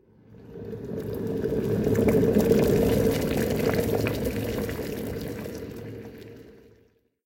Boiling water (shortened version)
Boiling water. Edited down to 7 seconds short